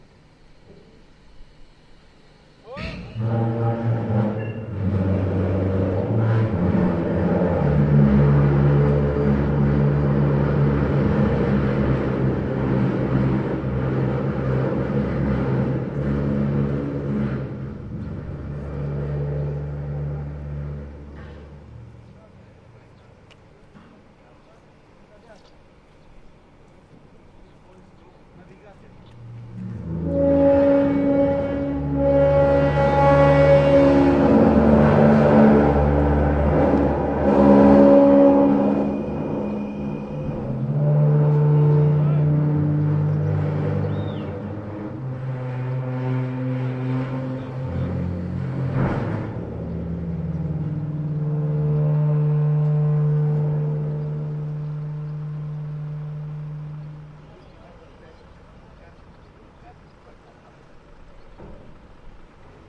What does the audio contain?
Troublant frottement d'un bac accostant. Passage en Roumanie